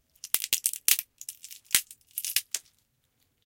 a walnut is crushed
fracture, crunch, bone-breaking, crackling